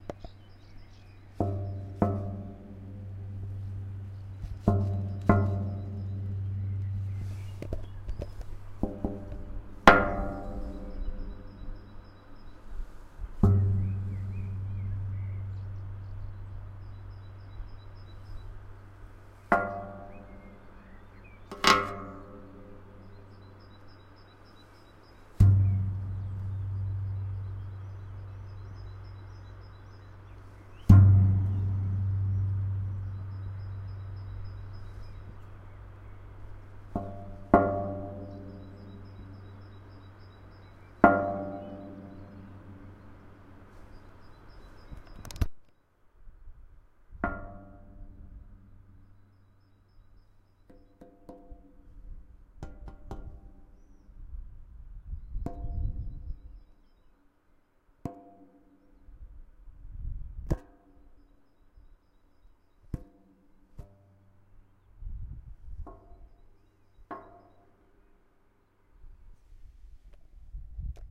03 metal kicks
me kicking in a huge metal structure up in a mountain + distant birds and ambiance.
snare, kick, metal